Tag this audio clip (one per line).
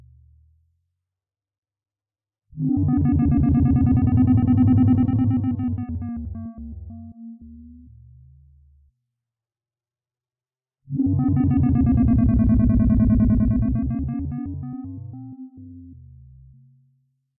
ambient
rare
pd
analog
experimental
idm
modular
puredata